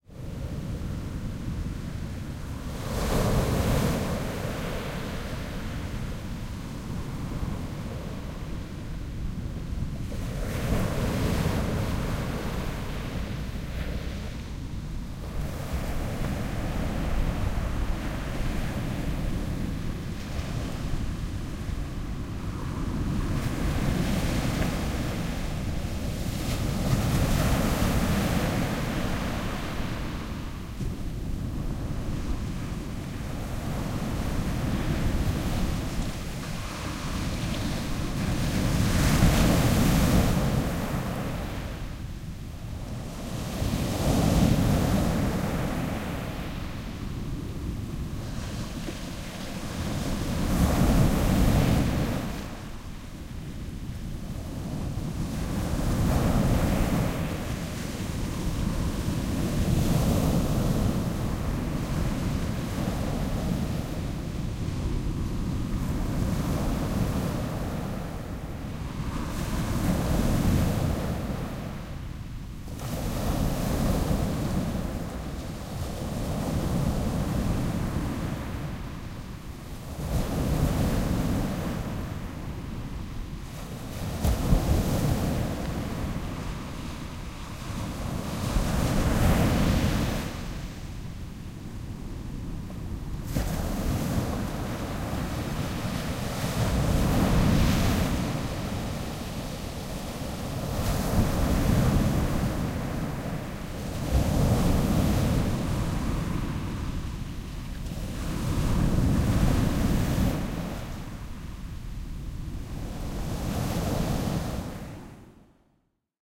Palm Cove Waves
Standing facing the 50 cm high waves crash onto a sandy beach at Palm Cove (Queensland, Australia). Recording chain: Panasonic WM61-A home-made binaural Microphones - Edirol R-09HR
palm-cove, sea, coast, beach, waves, field-recording